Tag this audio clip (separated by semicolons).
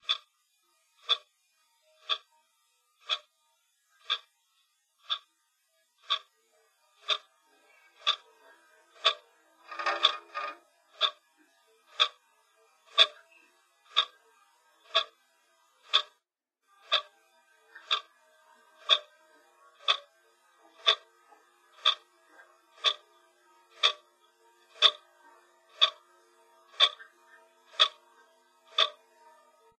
effect; ambient; clock